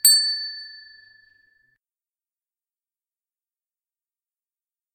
Bicycle Bell from BikeKitchen Augsburg 08
Stand-alone ringing of a bicycle bell from the self-help repair shop BikeKitchen in Augsburg, Germany